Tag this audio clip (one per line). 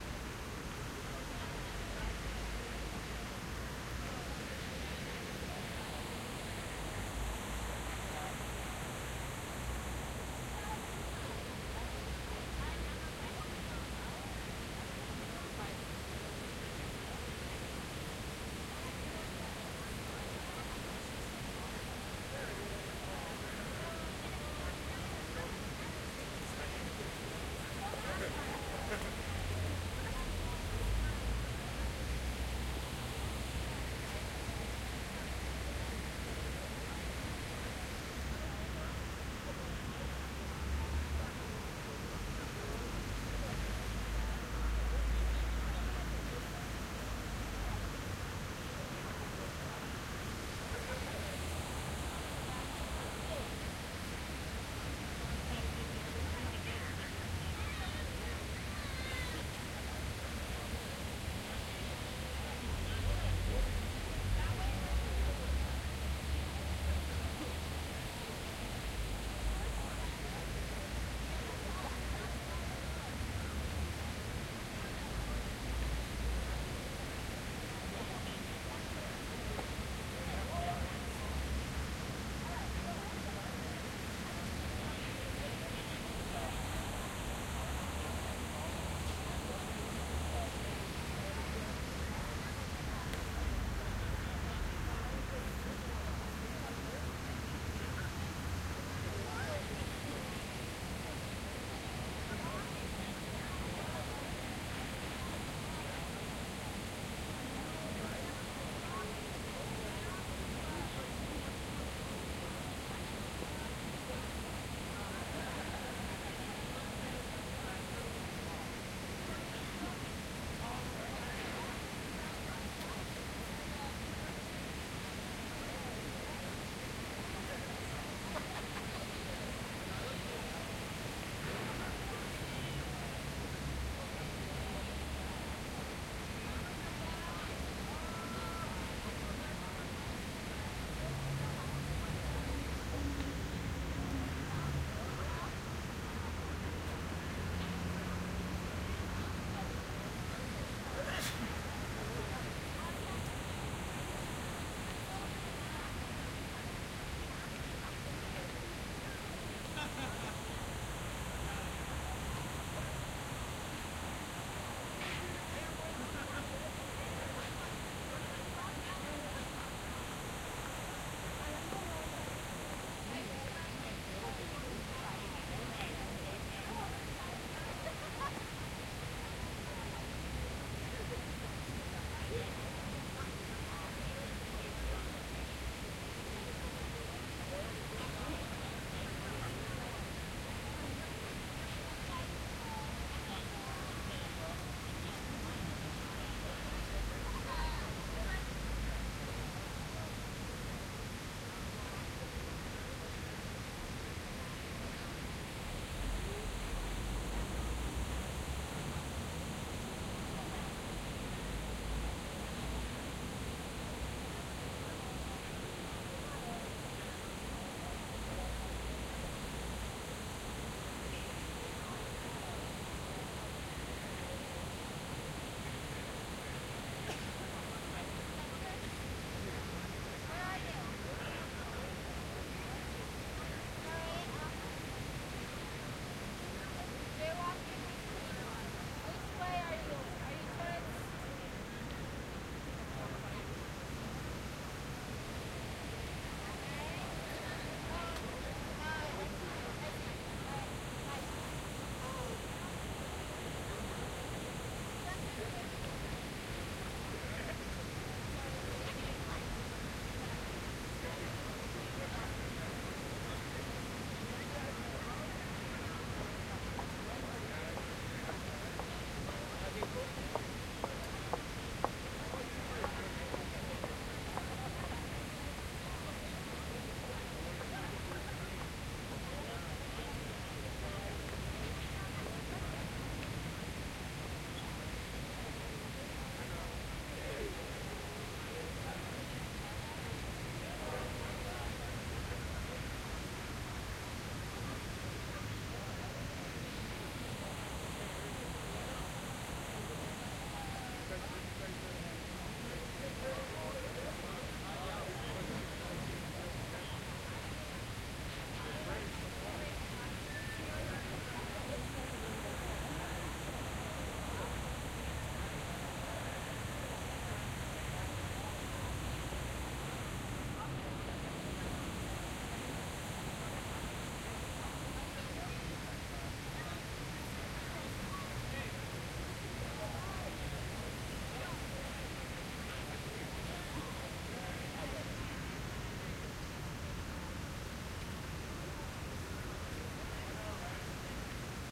binaural
city
field-recording
fountain
geotagged
noise
people
sonography
traffic
water